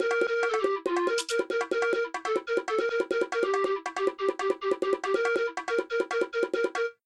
Pan flute and bongos festive melody.
bongos, festive, flute, melody, Pan